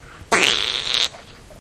tree frog fart
aliens, explosion, fart, flatulation, flatulence, gas, laser, noise, poot, weird